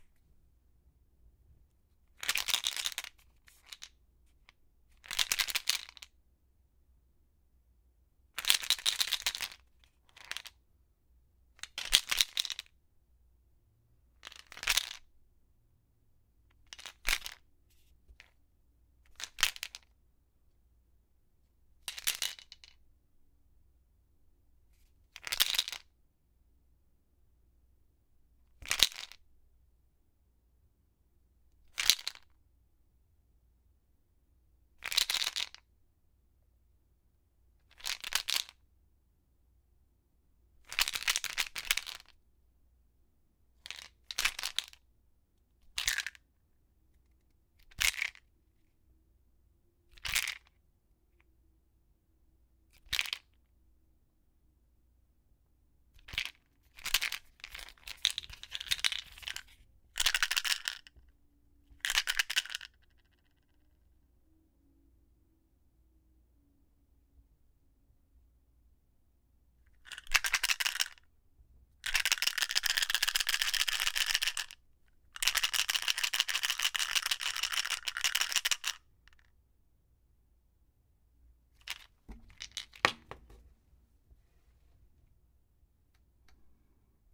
BTLshk(largepills)(T10)
I put several large pills in a small prescription bottle and shook it. There aren't many pills in the bottle so the bottle would sound more empty.
pills, shaking